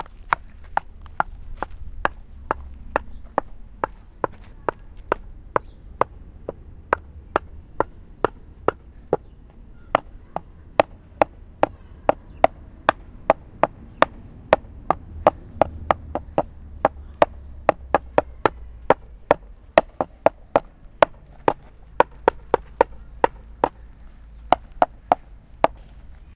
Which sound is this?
sonicsnaps EBG 2
Knocking on wood.
Field recordings from Escola Basica Gualtar (Portugal) and its surroundings, made by pupils of 8 years old.
sonic-snap
Escola-Basica-Gualtar
Escola-Basica-Gualtar, sonic-snap